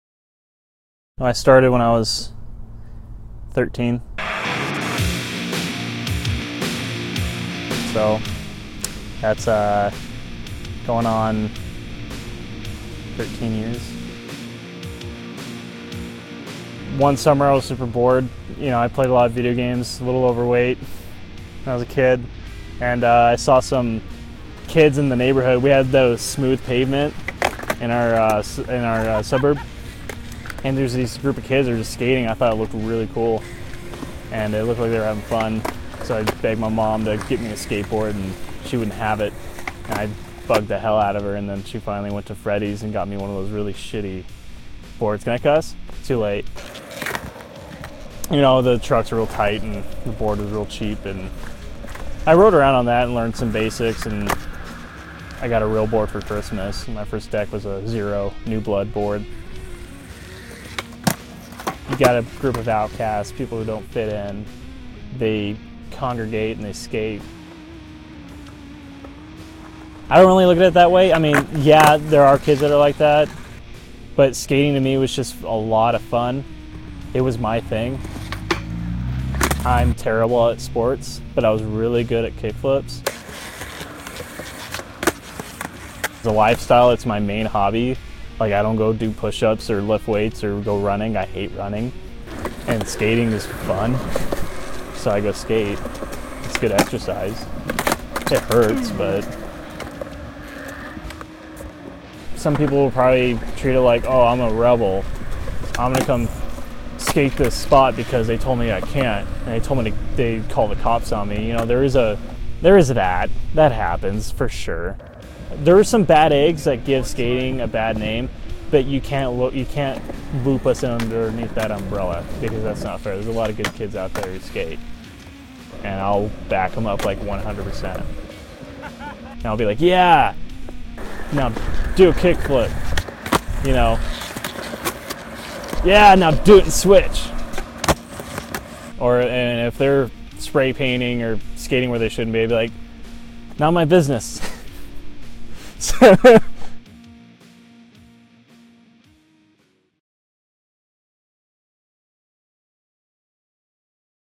Audio from a mini-documentary about skateboarding. Whole documentary audio.